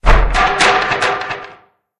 The sound of a door, beeing destroyed by a powerfull kick. Recorded in my cellar by kicking an old door. (It survived, no worries)